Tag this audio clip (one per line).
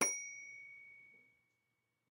bell
phone
rotary
Telephone